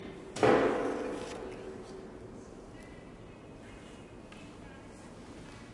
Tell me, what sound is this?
bang in a large empty hall. Edirol R09 internals